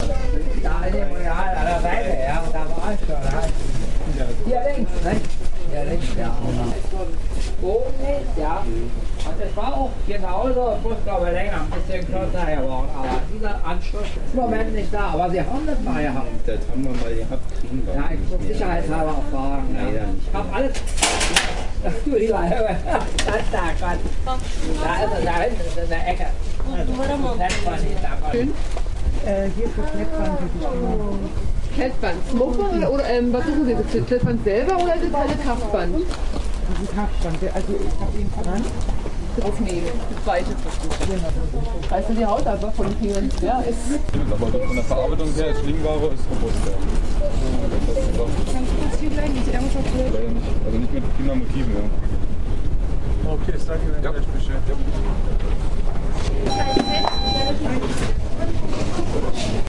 baumarkt hallesches tor 2009 Oct. 14. -
12.30 o clock people talking to employees
about different things
metropolis soundz geotagged